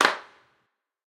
Clap with small reverb
buttchicks, clean, field, recording, reverb